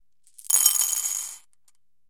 marbles - 15cm ceramic bowl - drop - handful of ~13mm marbles 03
Dropping a handful of approximately 13mm diameter glass marbles into a 15cm diameter ceramic bowl.